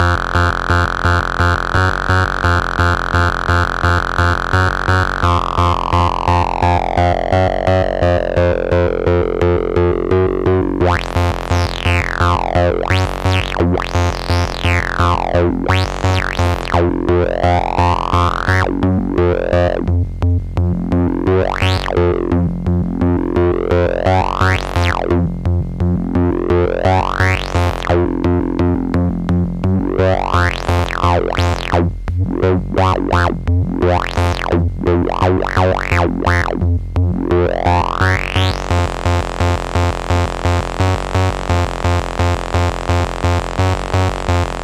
Monotron->OD808->Filter Queen->Ensemble